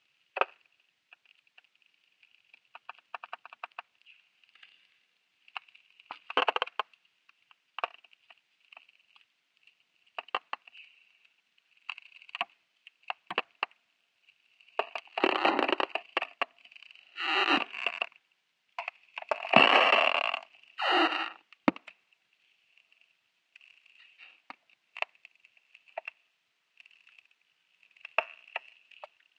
Field recording from an island just outside Helsinki, Finland. Ice is almost melted, just thin layers left.. It was a sunny day so ice kept craking, some light waves. Almost no wind.
Hydrophone -> Tascam HD-P2, light denoising with Izotope RX7
craking, field-recording, ice